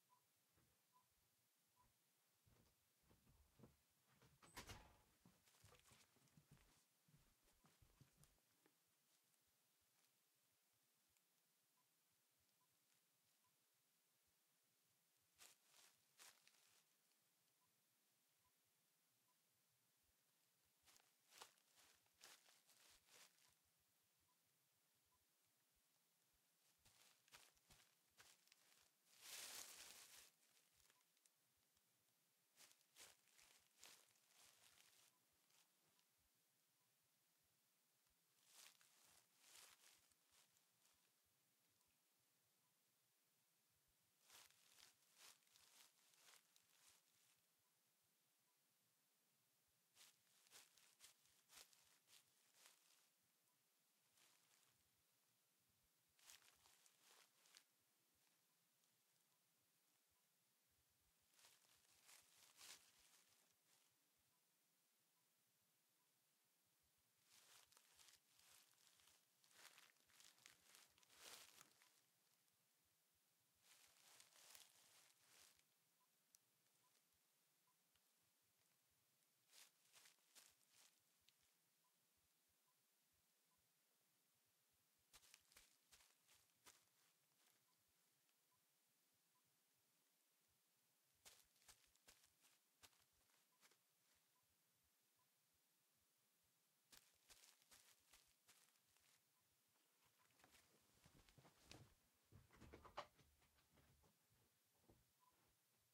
Leaf shift 5
Leafs, Paper, Rapping